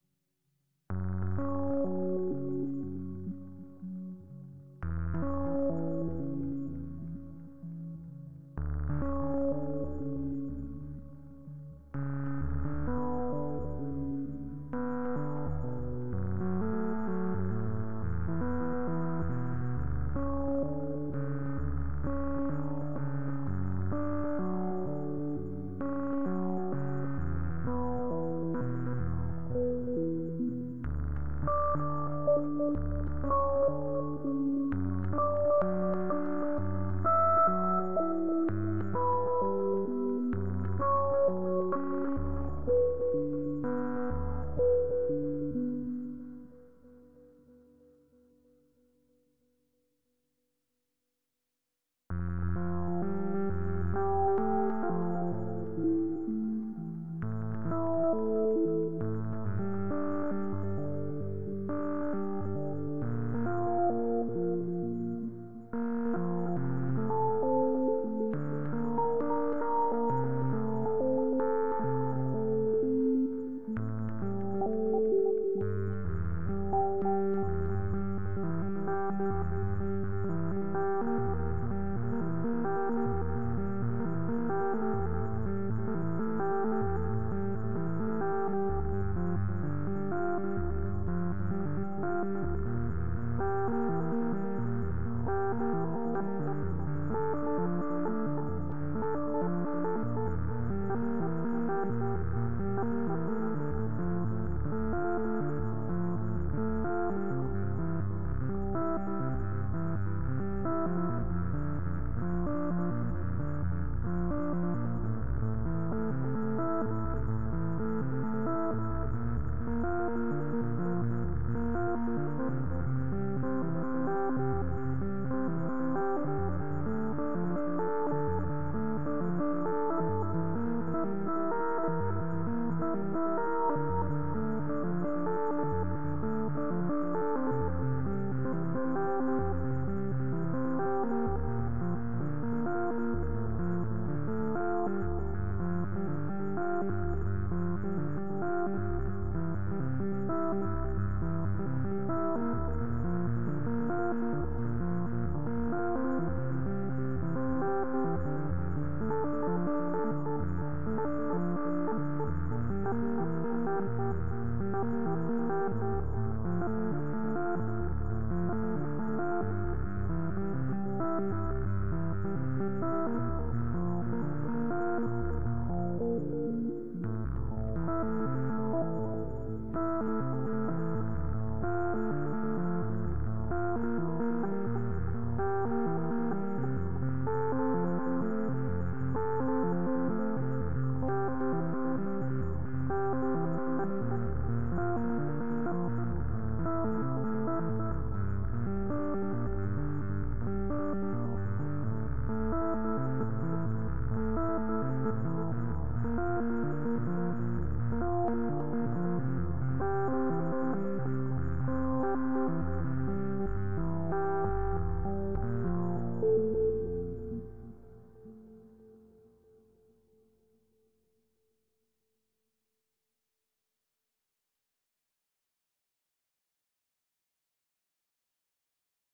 midi is fun
acid, synth, awesome